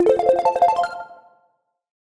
Jingle Win Synth 00
An uplifting synth jingle win sound to be used in futuristic, or small casual games. Useful for when a character has completed an objective, an achievement or other pleasant events.
synth, achievement, gamedeveloping, indiedev, jingle, sci-fi, game, videogames, succes, futuristic, win, sfx, gamedev, games, gaming, video-game, electric, celebration, indiegamedev